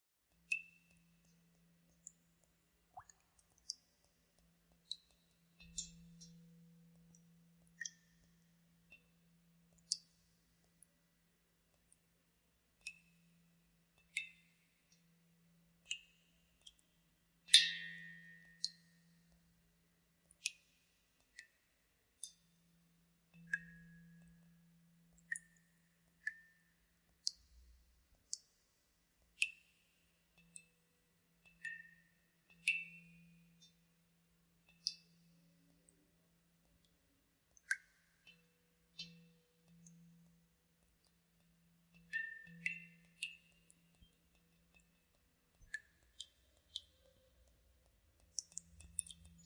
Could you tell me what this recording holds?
Water drops into a metallic bowl
Sample of a flurry of water drops falling into a large, metallic bowl. Recording made using a pair of AKG C451Bs and an Apogee Mini-ME.
Bubbles, Metal, Water, Rain, Drops, Bowl, Splash, Wet